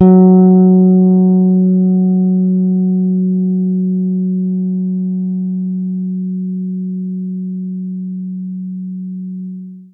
this is set of recordings i made to sample bass guitar my father built for me. i used it to play midi notes. number in the filename is midi note.
bass, tone